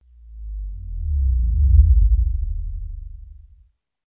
granular passby. Created using Alchemy synth